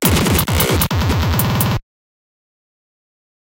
Do you like Noisy Stuff ( No Para Espanol)
Breaks